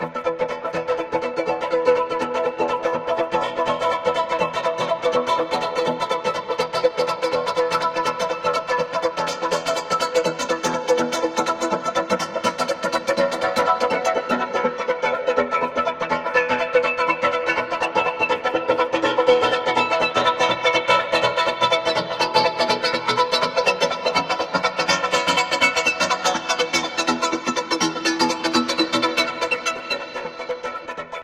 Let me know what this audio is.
PiCycle Seamless
Saturation, Arpeggio, Plucked, Wide, Electronic, Synth, Strings, Space, Tape